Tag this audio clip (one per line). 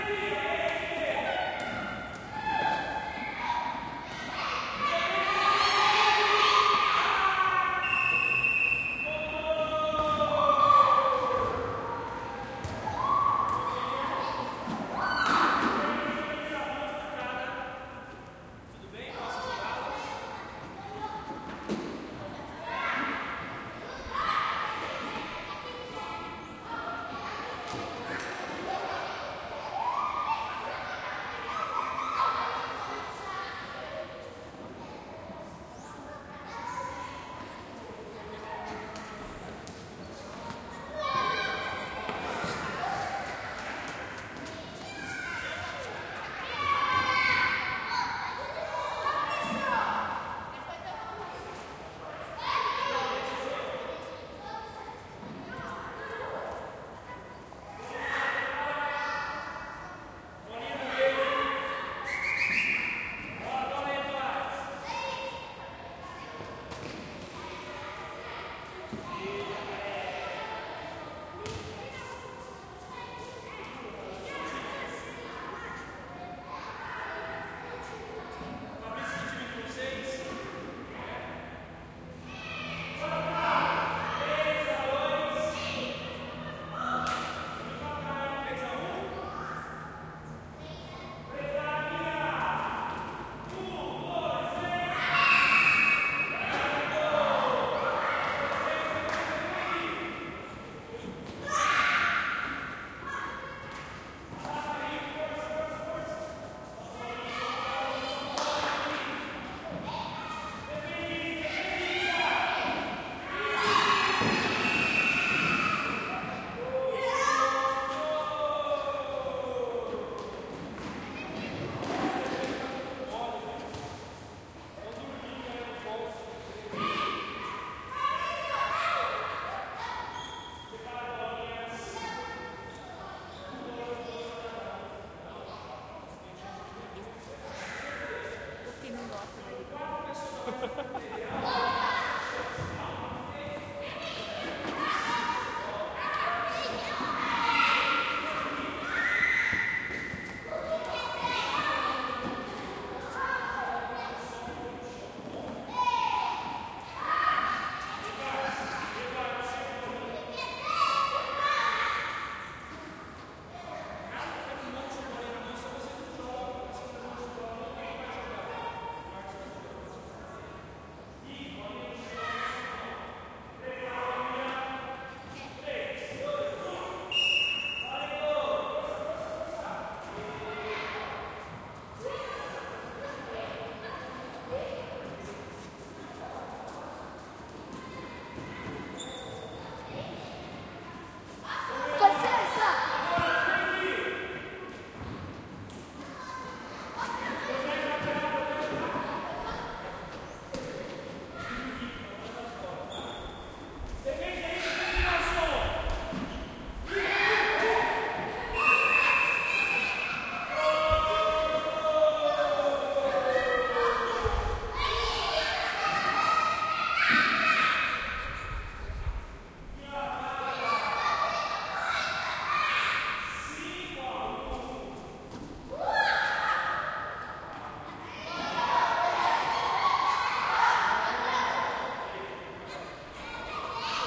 colegiosaobento
colegio
quadra
quadraesportiva